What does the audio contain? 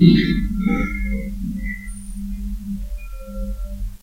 Bonks, bashes and scrapes recorded in a hospital at night.
hospital, percussion